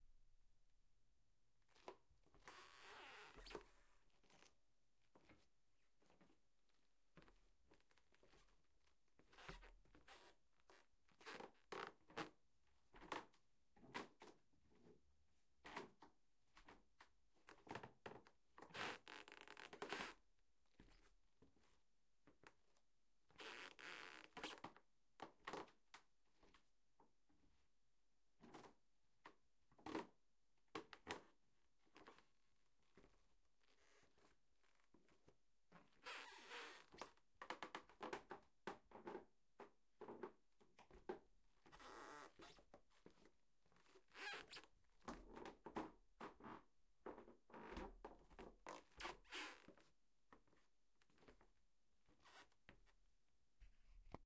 creaking floor
Creaking bedroom floor recorded with tascam DR 40
bare, bare-feet, barefeet, bare-foot, barefoot, creak, creaking, creaky, feet, floor, foot, footsteps, hardwood, squeaking, steps, walk, walking, wood